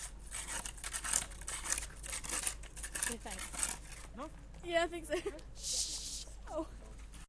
SonicSnaps HD Mia&Meghan Scraping
This is a sonic snap of a scrapping sound recorded by Mia and Meghan at Humphry Davy School Penzance
cityrings, mia, sonicsnap